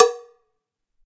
Mambo Bell - Mouth
Toca Timbale Bell mouth (lower pitched)
afro-cuban, bell, percussion, salsa, sample, timbales